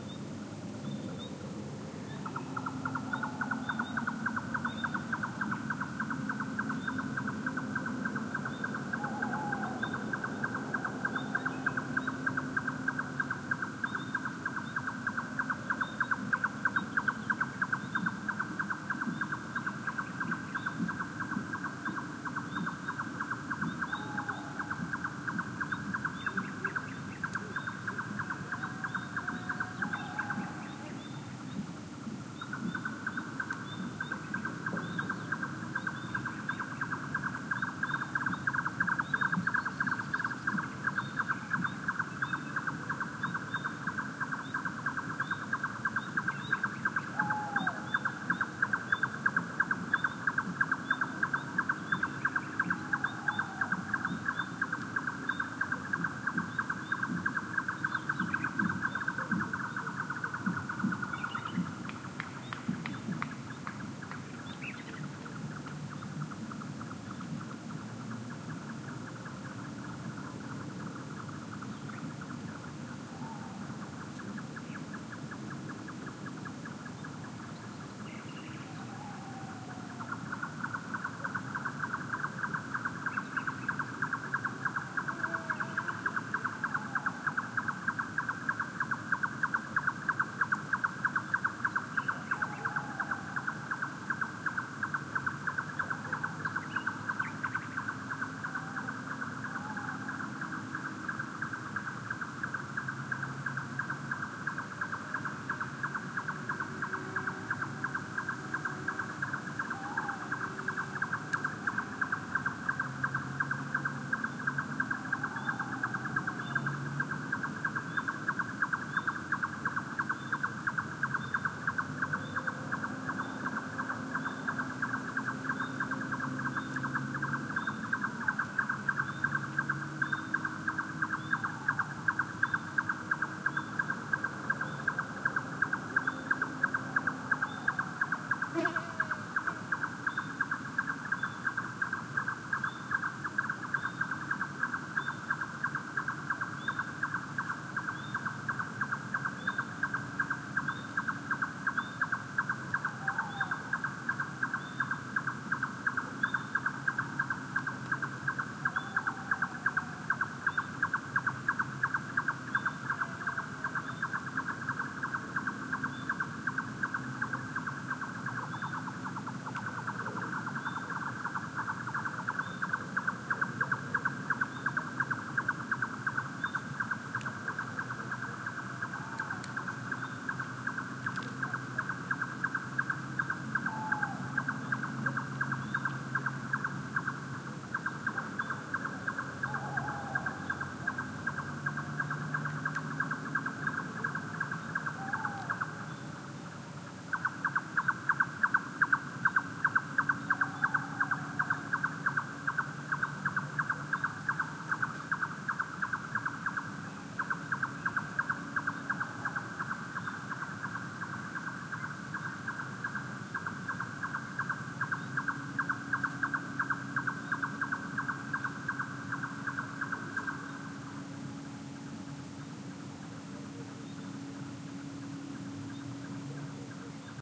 Summer dusk ambiance, with callings from Tawny Owl and Nightjar. Recorded in Pine forest near Aznalcazar (Sevilla province, Spain) using Sennheiser MKH 60 + MKH 30 into Shure FP24 preamp, Tascam DR-60D MkII recorder. Decoded to mid-side stereo with free Voxengo VST plugin